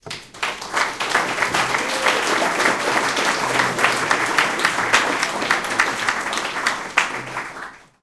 Short Applause and Murmur
People applauding at a small gathering.
Recorded with Zoom H2. Edited with Audacity.
applause, cheer, conference, crowd, end, group